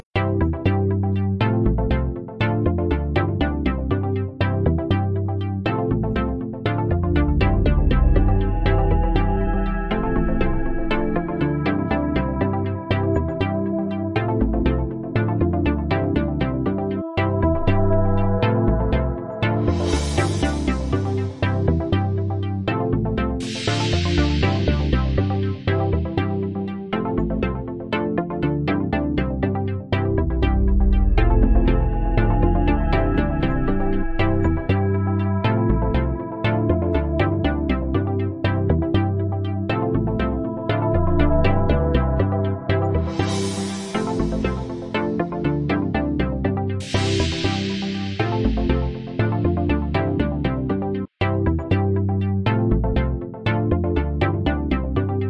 2 Random Samples
These 2 samples were showing one next to the other.
I pressed the 'play' button on the second one while the first was still playing and it stroke me how well they blended together.
Then I just added this
Be warned that the sequence is loopable (120bpm), BUT spans an awkward number of bars = 26. This took no more than 3-4 min to put together.
Credits and thanks to the creators of the samples used here.
loopable, chilled, musical-sequence, 26-bars, mellody, 120bpm, image-to-sound, loop, Dare-26, relaxed